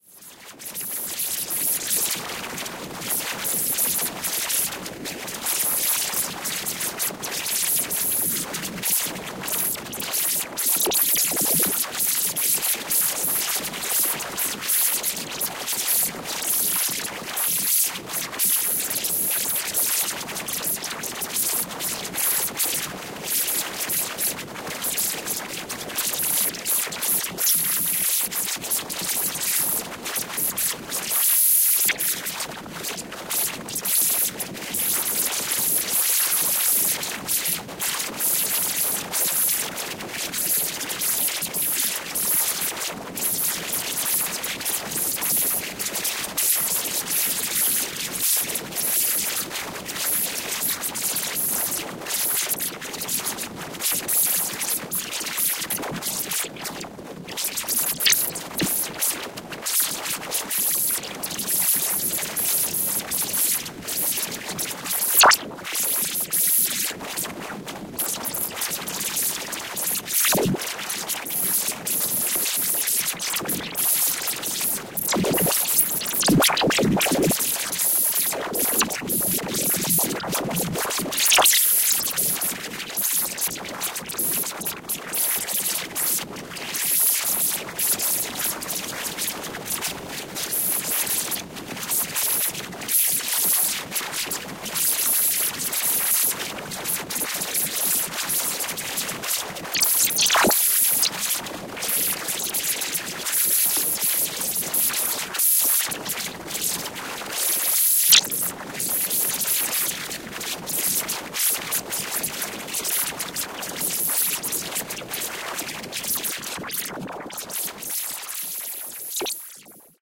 This sample is part of the “Wind” sample pack. Created using Reaktor from Native Instruments. Similar to Wind14, but with less strange interruptions.
ambient, wind, soundscape, drone, reaktor